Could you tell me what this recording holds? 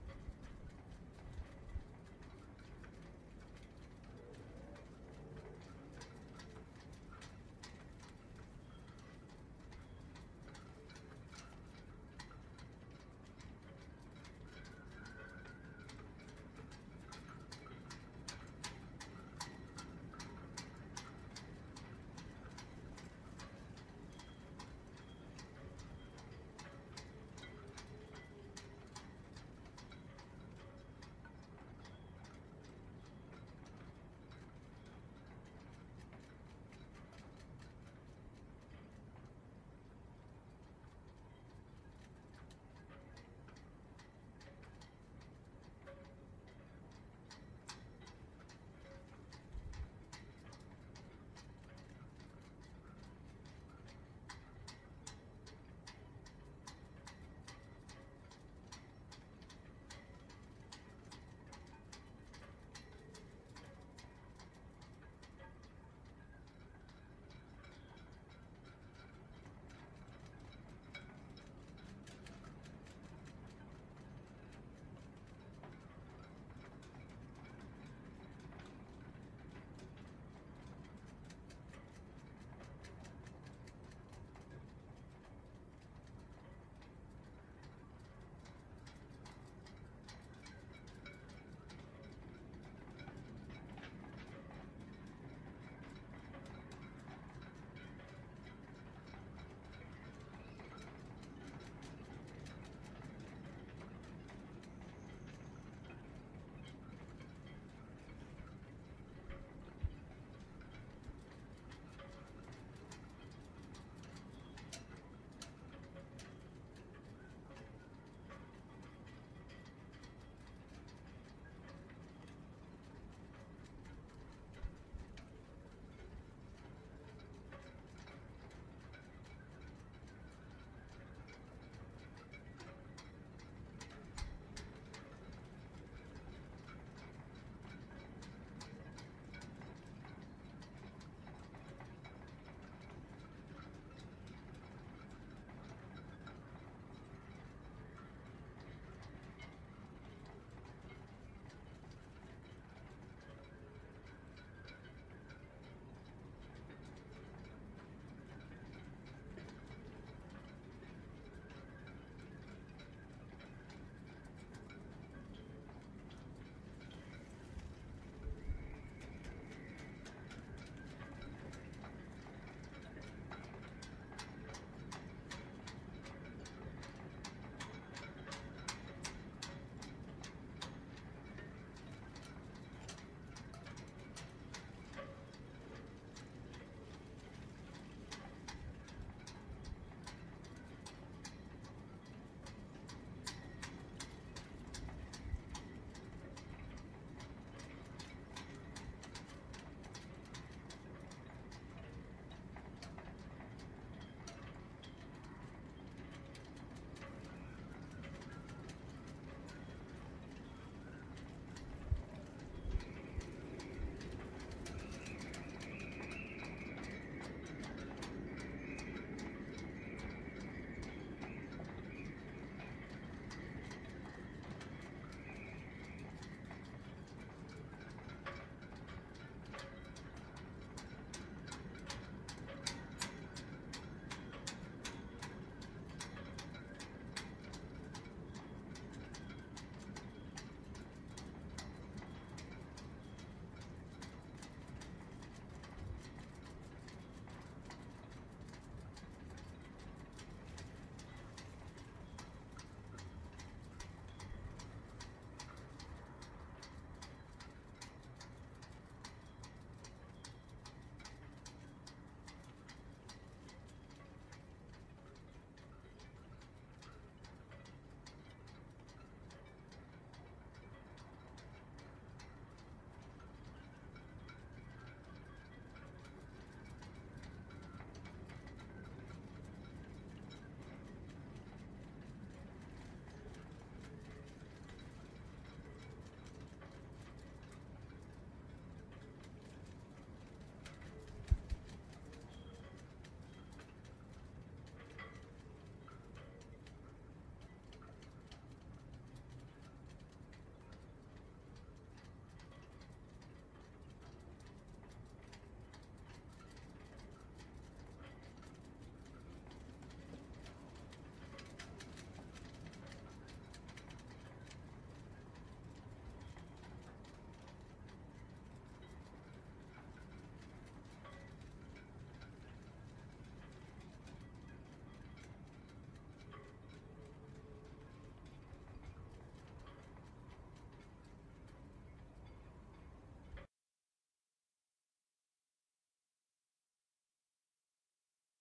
shipyard winds 01.02.09
ambient, cornwall, rigging, sea, ships, wind